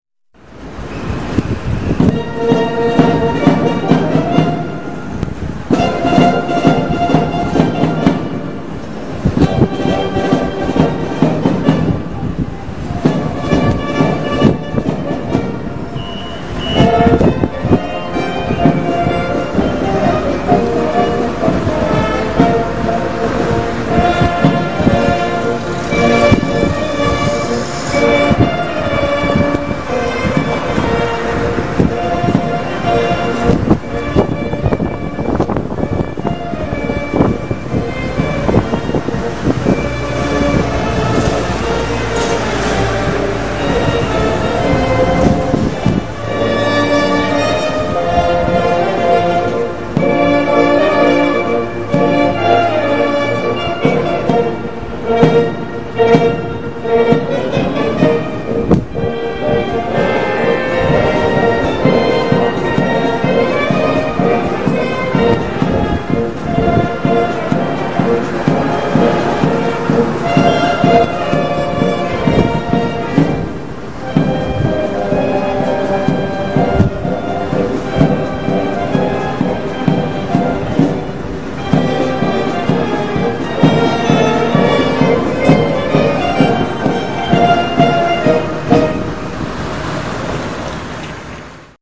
69º Aniversario de la proclamación de la República de Italia
Monumento a Giuseppe Garibaldi, Plaza Dorrego, Alsina esq. Dorrego
2/6/2015 12.18hs
por Belén Martelli
Bahia-Blanca, Patrimonio-Sonoro, Musica, Aniversarios, Colectividades